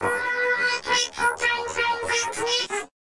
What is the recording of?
Alien Voice1
An alien voice I created speaking...something in his native tongue.